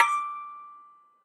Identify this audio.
childs-toy cracktoy crank-toy metal musicbox toy
cracktoy, crank-toy, toy, metal, childs-toy, musicbox